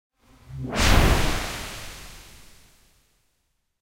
A swift swooshy sound